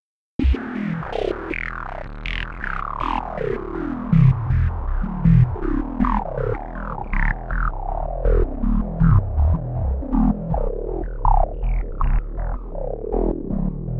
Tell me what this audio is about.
bloops and blips